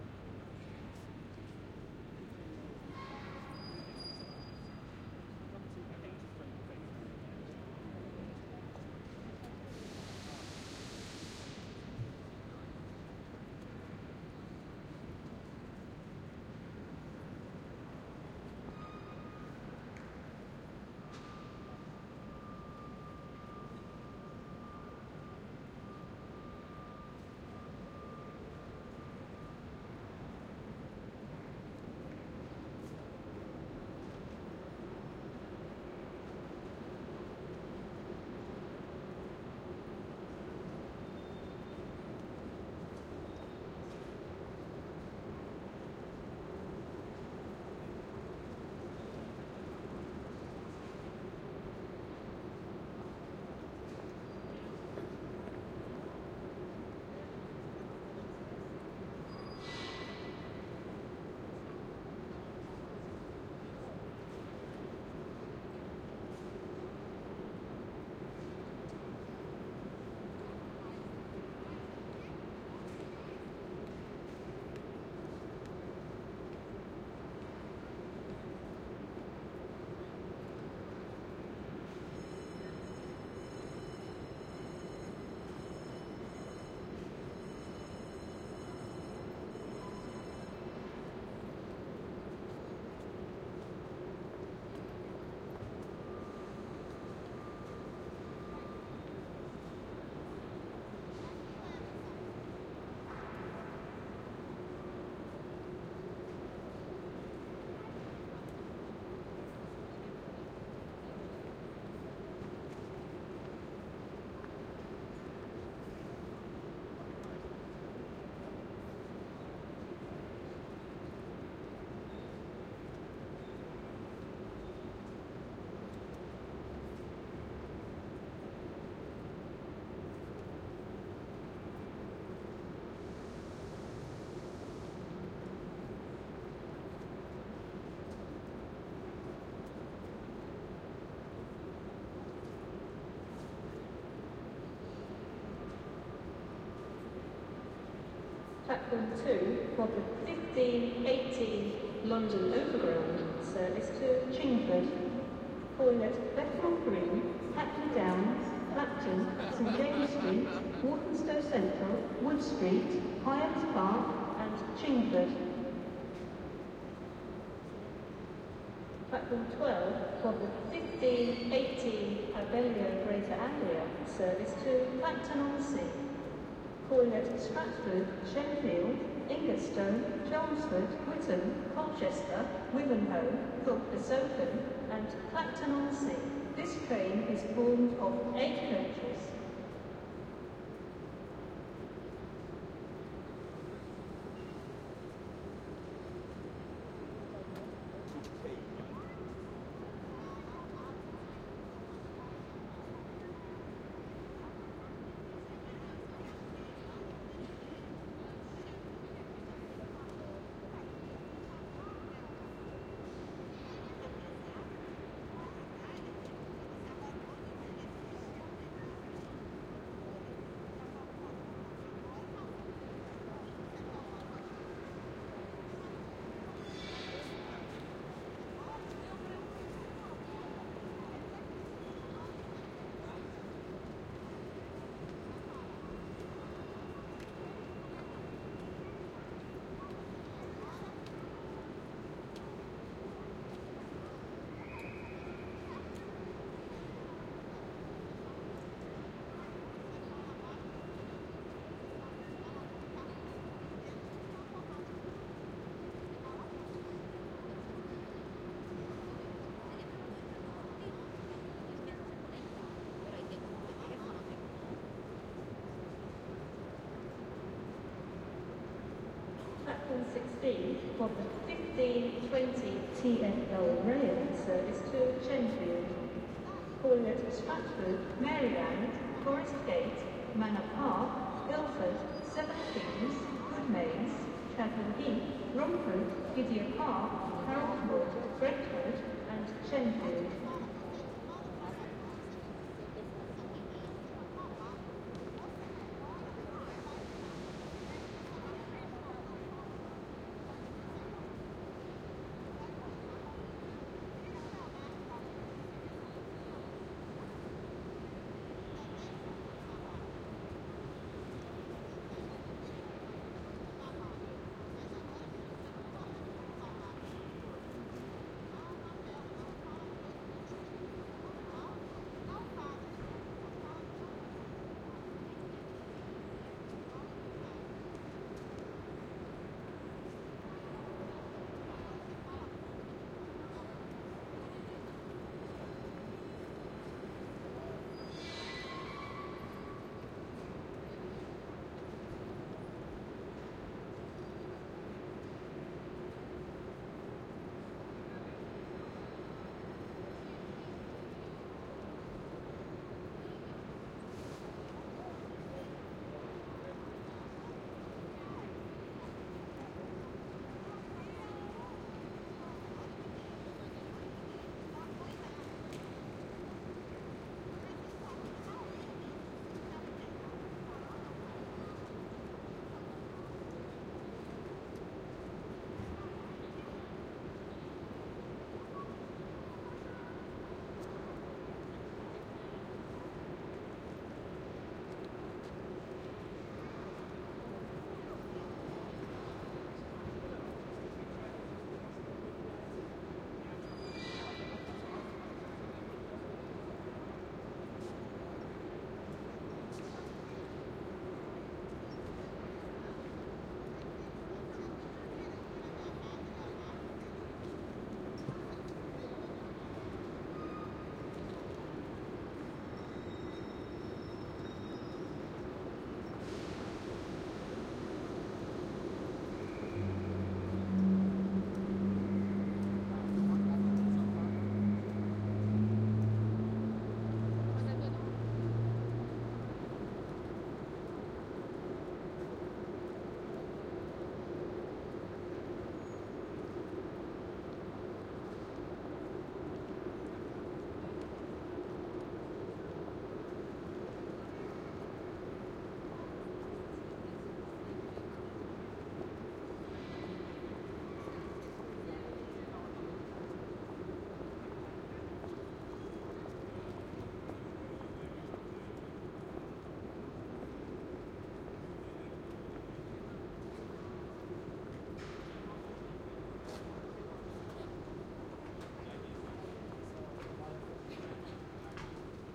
Ambience - Train Station - Inside
Inside Liverpool Street Station, London, 3:30pm
ambience, announcement, arrival, arrive, automated, cityscape, depart, departing, departure, england, field-recording, Liverpool, london, london-underground, metro, platform, rail, railway, railway-station, service, station, Street, subway, train, train-station, tube, underground